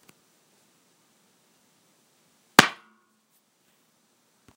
shot glass slam
sound of a shot glass being slammed on a table
drinking, glass, shot, slam